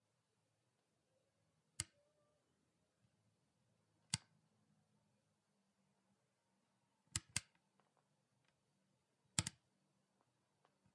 Amp switch
On/off switch on my bass amp
off, amp, short, switch, press, button, mechanical, click